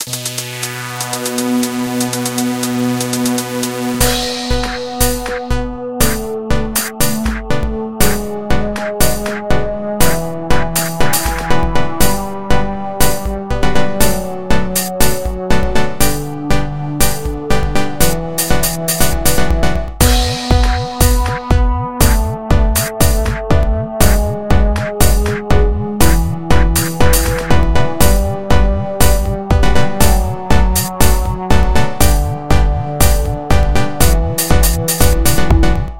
retro digital punk madcool loop
Created in LMMS. Video-game type feel adventure loop. Crashes, kicks, percussion and drone.
drum, techno, synth, bass, electro, punk, dance, loop, digital, trance, retro, madcool, electronic, house